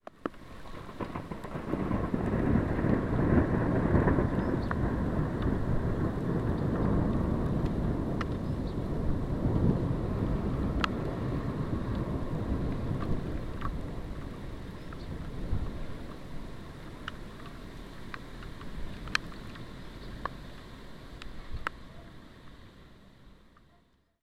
First crackling thunder of the year - the nearest thunder segment of the whole recording.Recorded by MP3 player put into a rain-protecting sponge.Date: 1st of March, 2008.Location: Pécel